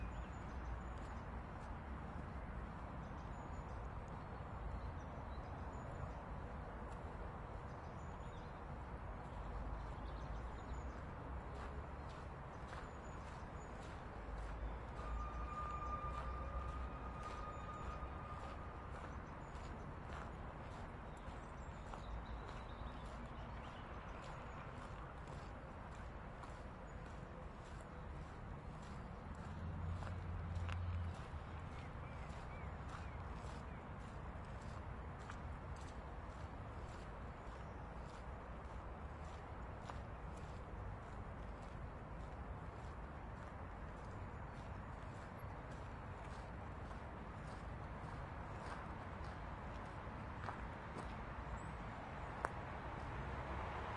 Steps 2 mono
Walking on wet ground and gravel. Traffic in the background.
traffic, sand, steps, gravel, walking